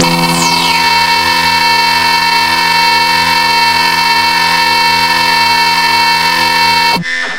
lead drug
record-death, drug-fire, sample-experimental